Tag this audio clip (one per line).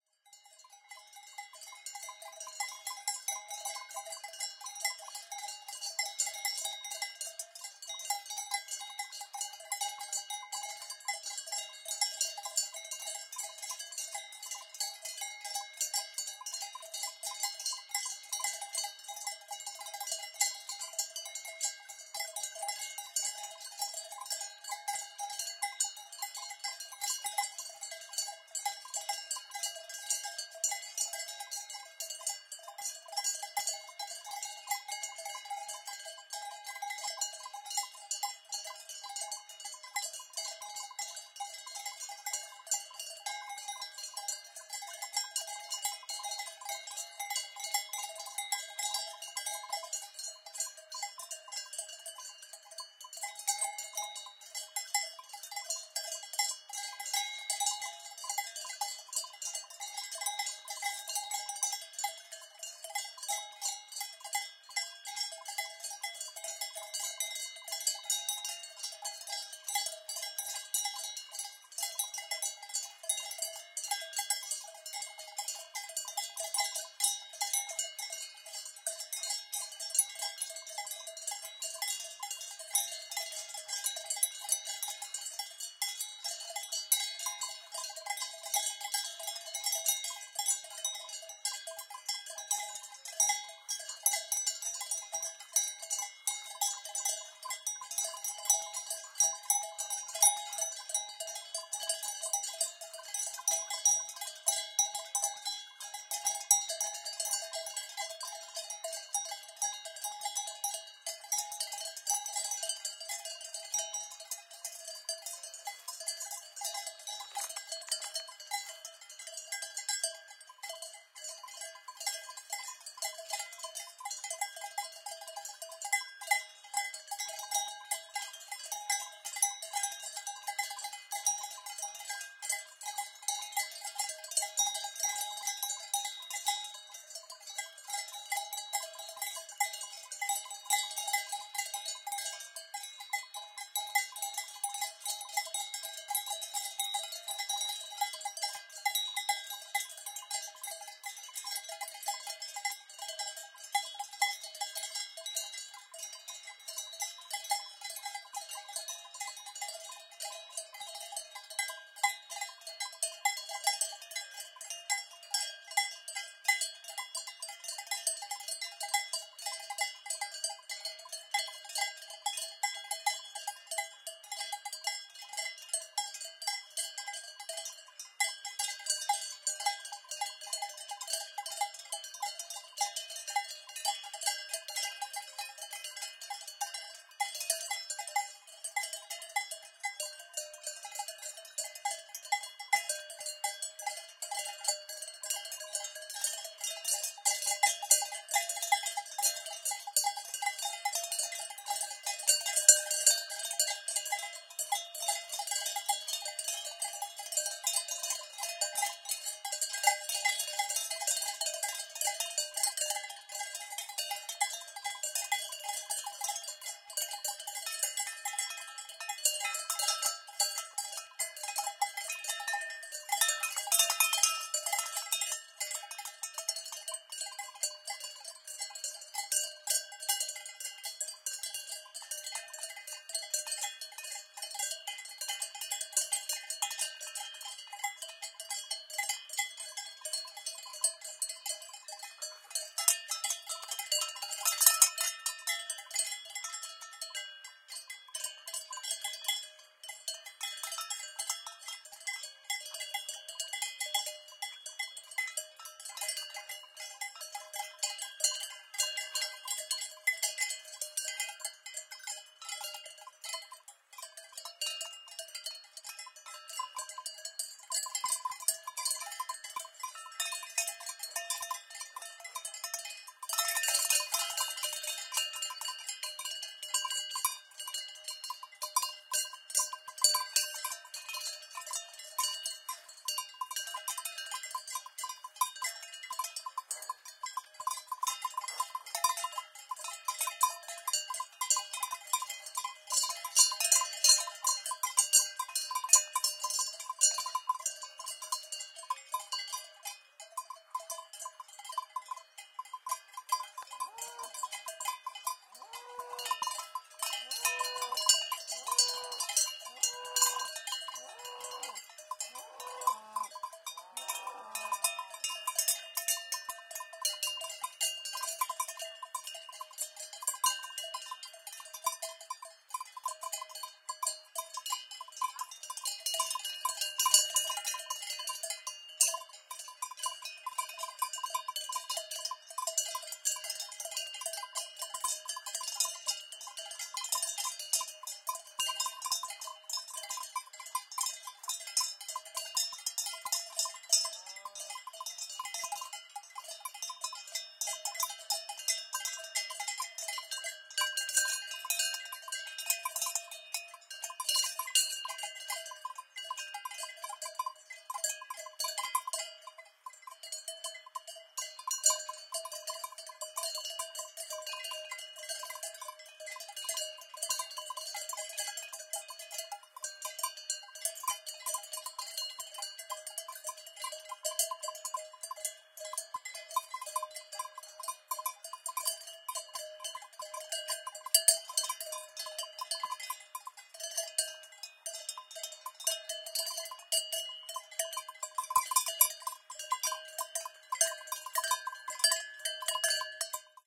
cowbells
countryside
farm
herd
Switzerland
Mountain-cows
mooing
cattle
Swiss-cows
cows
moo
cow
Astbury
pasture